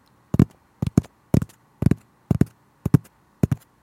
fingers tapping hard surface